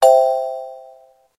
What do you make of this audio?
Crrect answer2
arcade, button, game, games, gaming, video, video-game